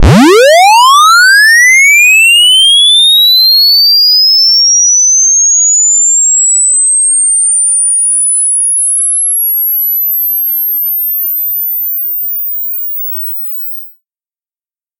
Square sweep (no alias) 1 Hz to 18 kHz
Square waveform. 1 to 18,000 Hz sweep
square, sweep, waveform